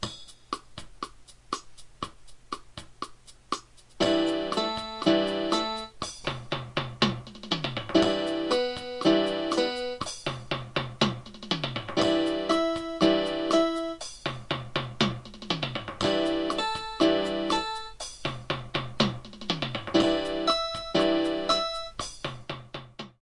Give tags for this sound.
experiment keyboard

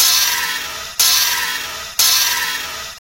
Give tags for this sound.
Alien Manipulations MTC500-M002-s14

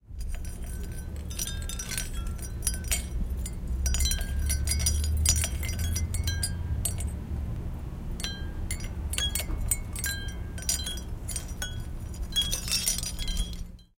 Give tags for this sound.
chimes wind-chimes

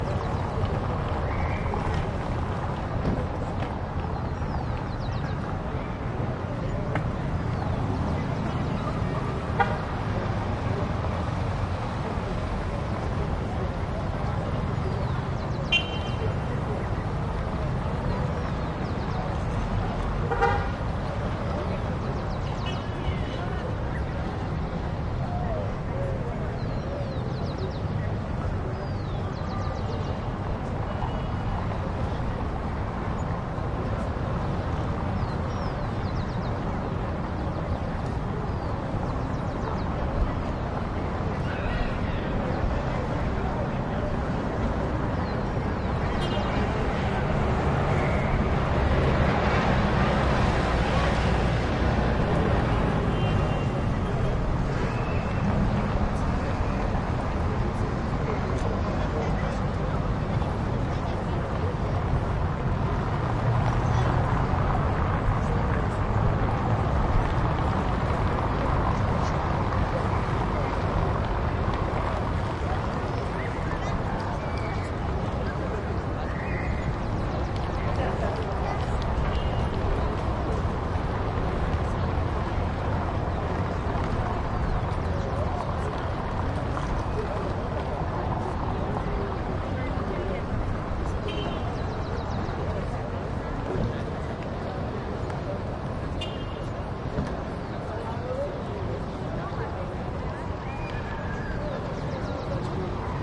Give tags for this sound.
Peru America cobblestone street traffic South medium

